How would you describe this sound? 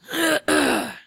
clear throat11
I was working on reading a narration, and I started to lose my voice and had to clear my throat often.
clear, girl, throat, voice